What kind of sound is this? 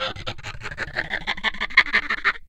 happy.monkey.08
daxophone, friction, idiophone, instrument, wood